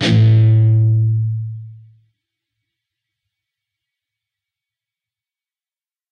Dist Chr Arock up pm
A (5th) string open, and the D (4th) string 2nd fret. Up strum. Palm muted.
chords, distorted, distorted-guitar, distortion, guitar, guitar-chords, rhythm, rhythm-guitar